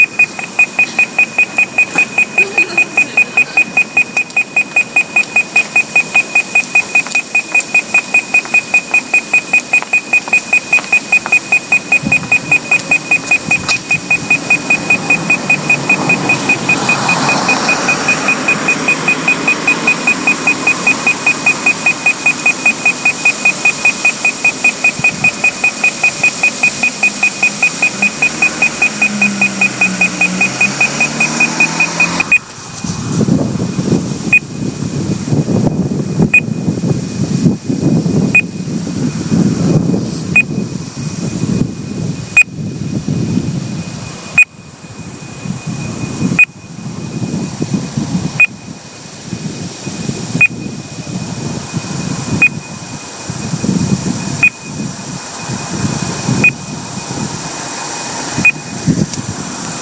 Mariehamn crossingsounds
Pedestrian crossing sounds in Mariehamn, the capitol of the Åland islands, Finland. Recorded with the RØDE recording app on an iPhone 4S internal mic.
pedestrian-crossing-sounds, pedestrian-crossing, Finland, Mariehamn, street, land, crossing, street-crossing